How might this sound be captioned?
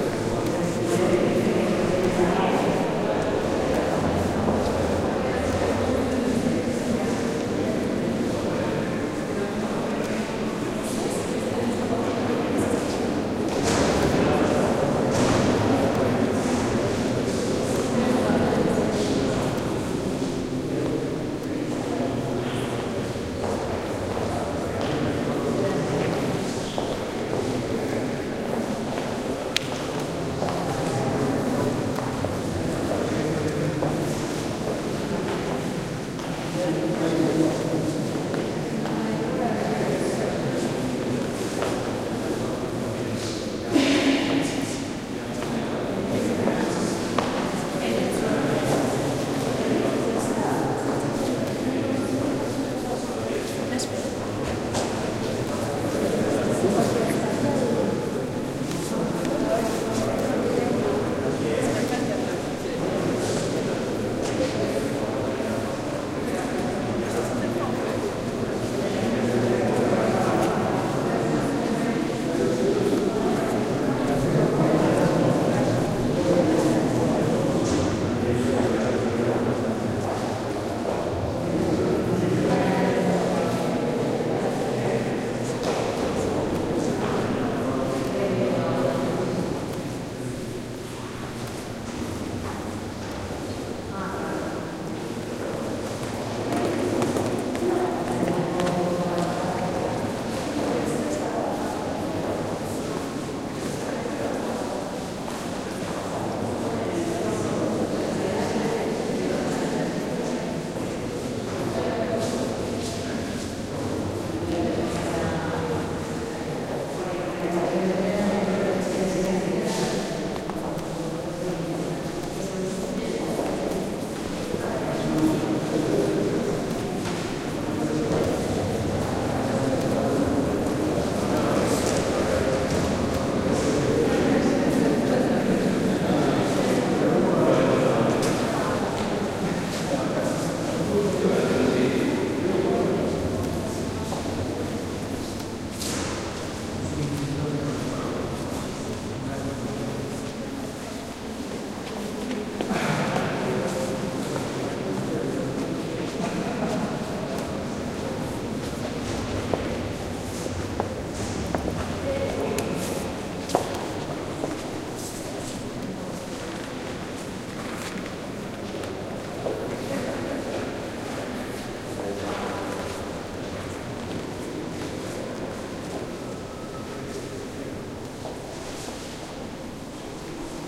ambiance in a museum gallery: voices, footsteps, reverberation. Olympus LS10 internal mics. Recorded in Museo Nacional Reina Sofia, Madrid, Spain